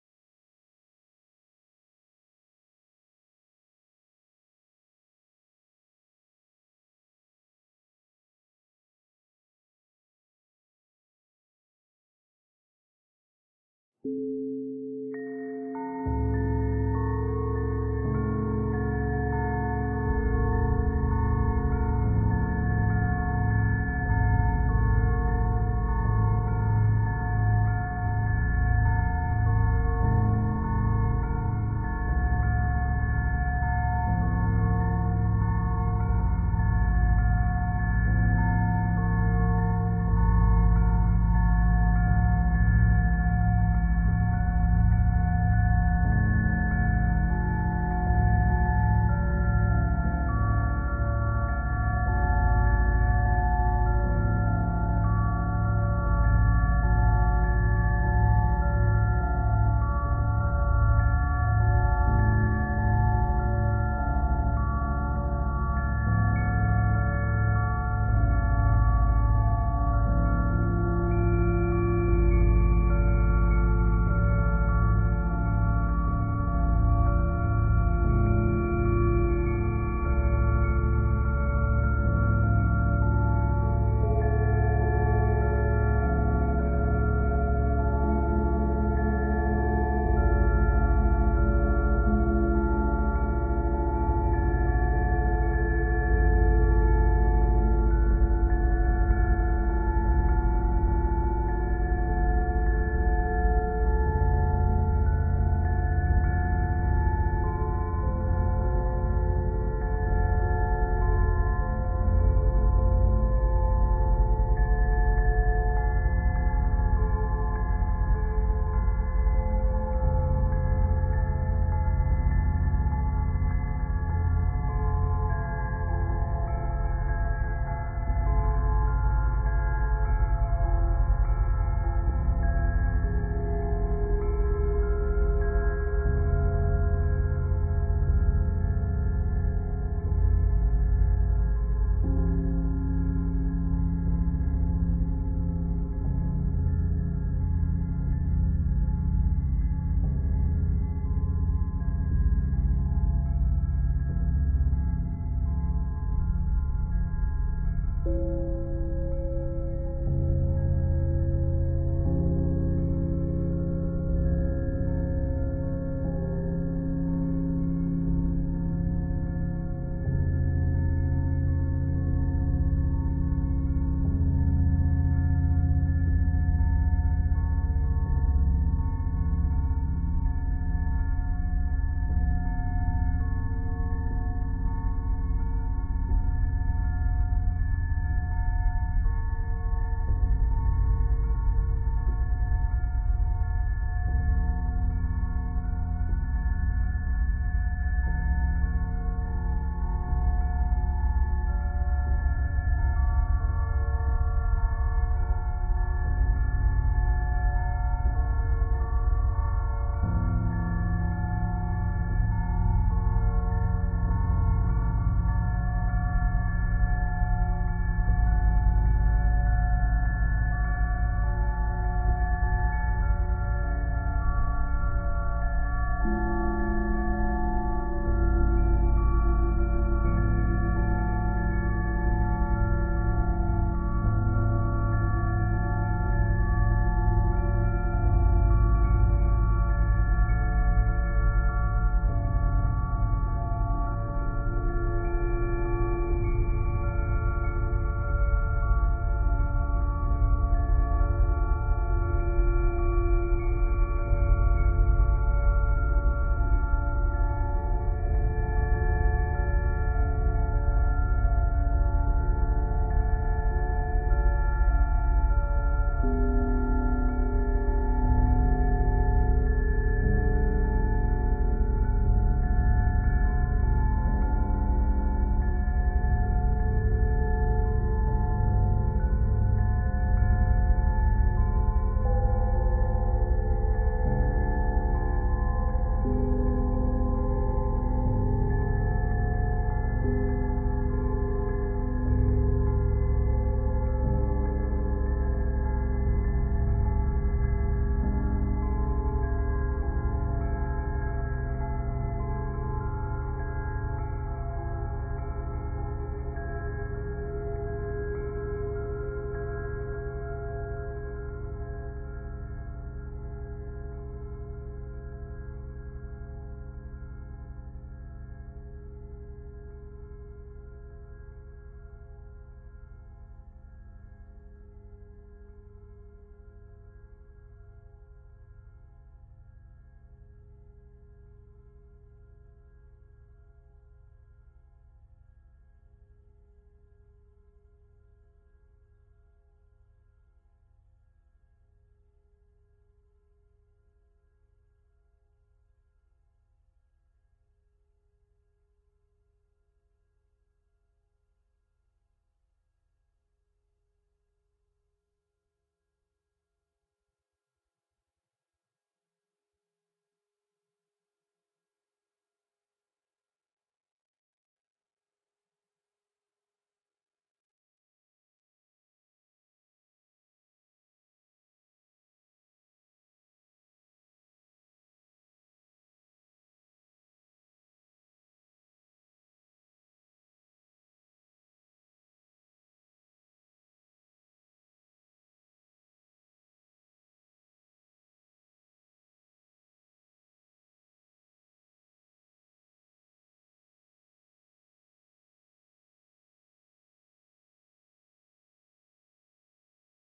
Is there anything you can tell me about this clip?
The Ghoulsomes
This is another spoopy long background music type thing I've thrown together with pitch shift and time stretch and echo. This one is a bit long to sit through and feels to me like it often needs more space in it, but I have no idea what I'm doing so I'm stuck with what I've got. For background creepy mood stuff there are definitely some good 30ish second stretches in there that are different enough from each other to suit somebody somewhere though, I'm sure.